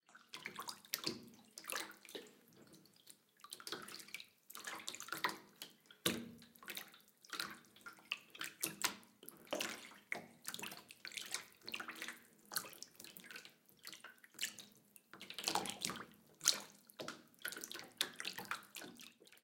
Recording of body washing in a bath.
Field-recording, Bathroom, Brush, Bath, Washing